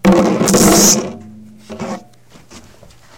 magnents bouncing on drums014
Sounds made by throwing to magnets together onto drums and in the air. Magnets thrown onto a tom tom, conga, djembe, bongos, and in to the air against themselves.
percussion, maganent-noises, bouncing